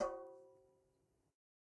Metal Timbale left open 015
conga, drum, garage, god, home, kit, timbale